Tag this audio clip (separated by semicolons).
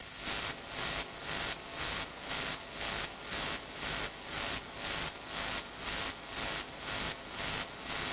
ohh; synth; ti; virus; virusti